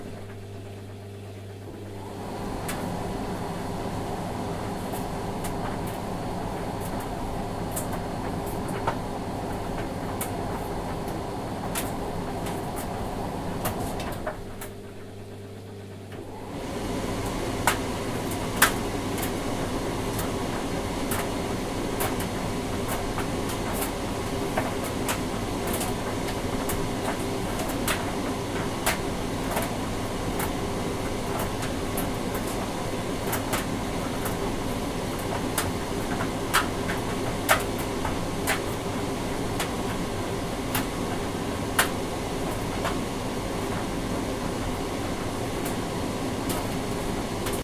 condenser clothes dryer
recorded using zoom h4n input via stereo mics. Post processed in Sony Sound Forge Audio Studio. 8kg dryer with a lot of washing including jeans, hence the loud clang.
laundry, clothes, dryer, washing, tumble, condenser-dryer, tumble-dryer, metal-button, under-clangers